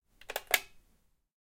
Old intercom button press and release 2
flat, foley, house, intercom, old, sample, telephone